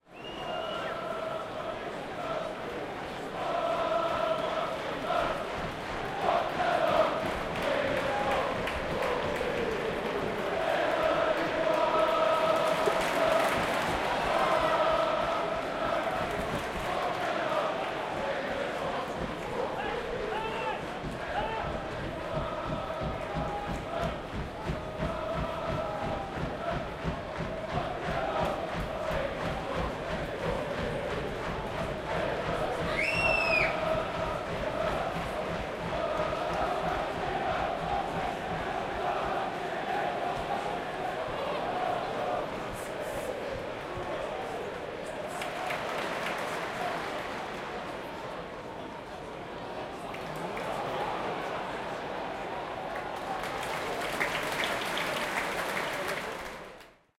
Football Crowd - Chanting Pochettino - Southampton Vs Hull at Saint Mary's Stadium
Recorded at Southampton FC Saint Mary's stadium. Southampton VS Hull. Crowd chanting for Pochettino (Manager).